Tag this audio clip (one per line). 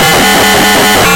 CMOS
digital
element
glitch
malfunction
modular
Noisemaker
production
synth